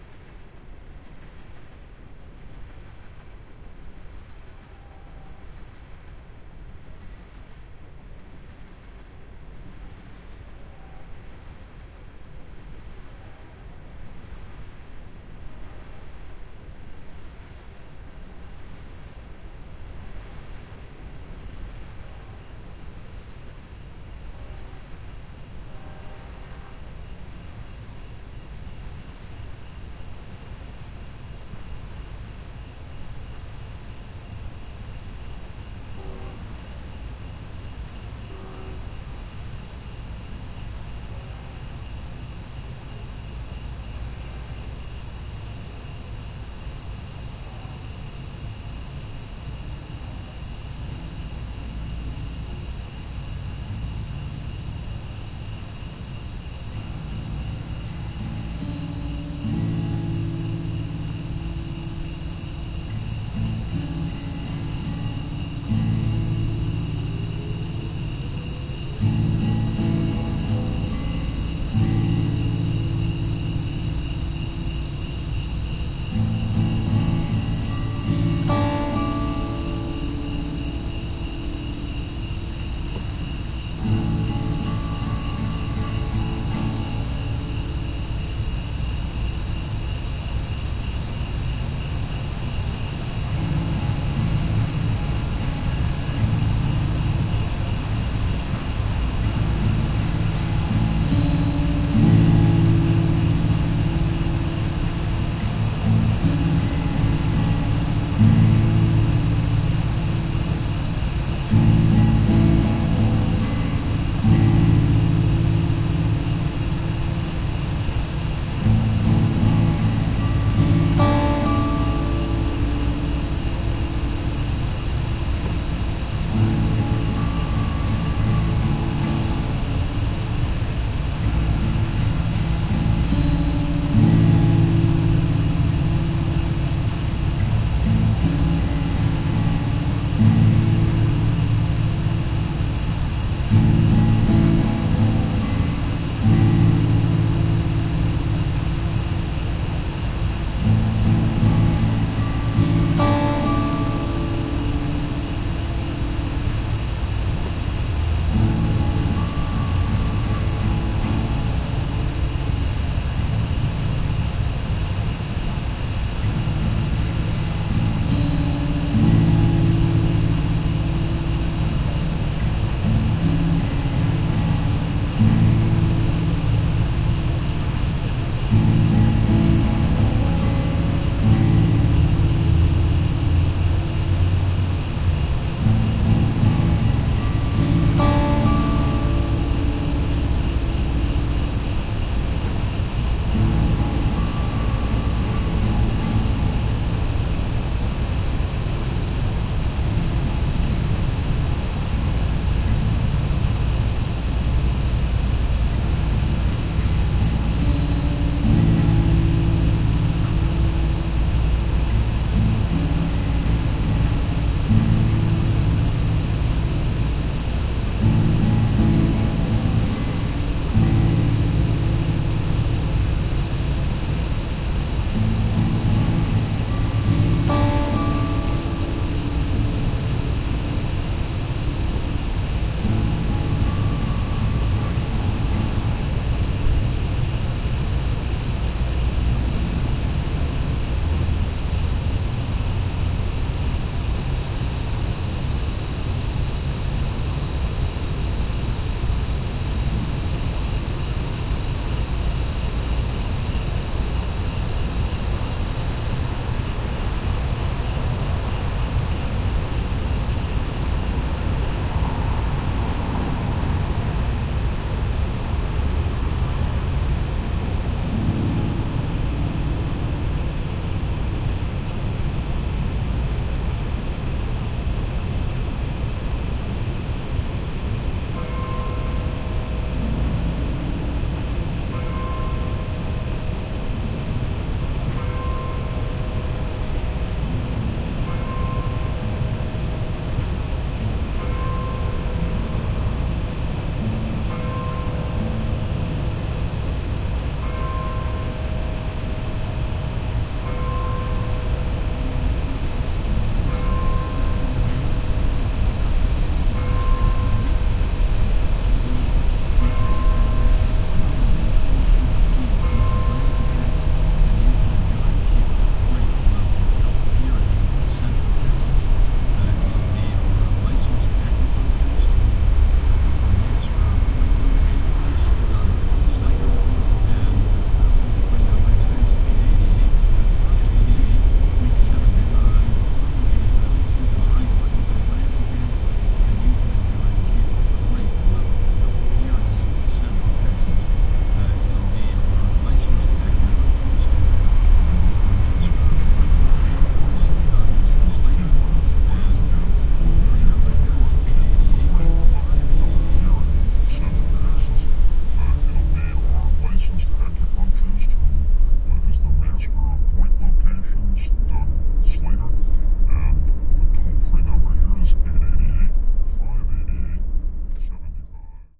A soundscape from my debut album, "Summer Crickets..." takes several field recordings of rural summer ambiance and mixes them with my own acoustic guitar strumming. I also mixed in some AM radio vocal snippets, resulting in a unique ambient piece that relaxes and unsettles simultaneously.